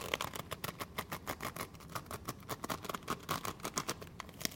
When you twist paper into a tight column, it makes this noise as you try to twist it further.